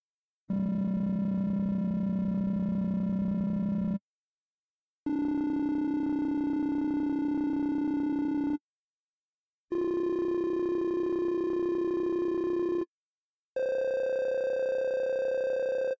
Tech UI Typing
A sound for when UI is typing on and on-screen interface or heads up display. Made with Reason.
bleep, game, HUD, rpg, tech, typing, ui, videogame